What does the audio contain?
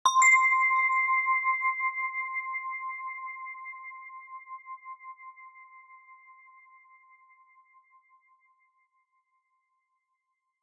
Christmas Bell 3
Christmas Bell, produced in Pro Tools with Native Instruments.
NativeInstruments, Protools